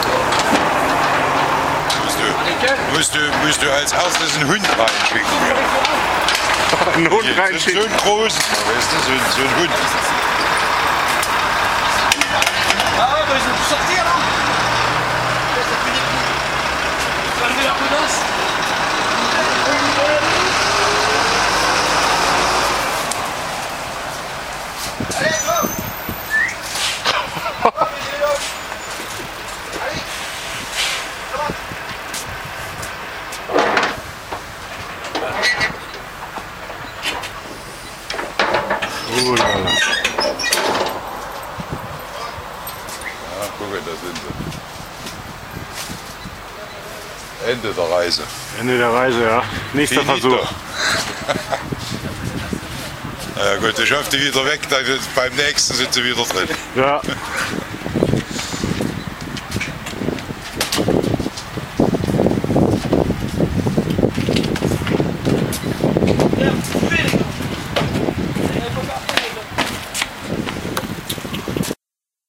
Detención de inmigrante escondido en el remolque de un camión en las inmediaciones del paso de Calais (Francia)
Immigrant Detention hidden in the trailer of a truck near the Pas de Calais (France)